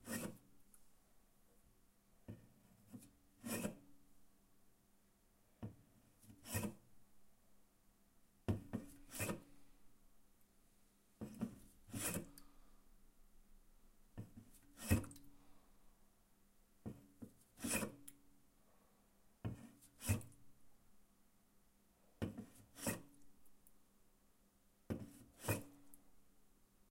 Large Monster Energy Drink Can Being Taken From Countertop (10x)
Multiple takes of a single Large Monster Energy drink can being removed from a kitchen countertop, then ever so quietly put back. Great for cinematics and audio drama scenes.
energy-drink, Large, Monster, soda